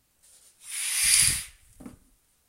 Opening A Curtain
this is the sound a curtain makes when one opens it
curtain, opening, OWI, slide